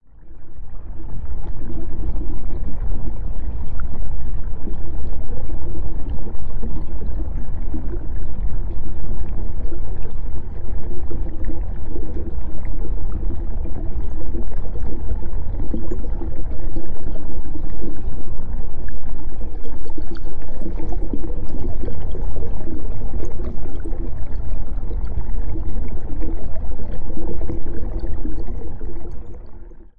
09 Stream, Dirty Sewer, Dark, Trickling, Burbling, Flowing, Underwater, Dive Deep 2 Freebie
Enjoy my new generation of udnerwater ambiences. Will be happy for any feedback.
Check the full collection here: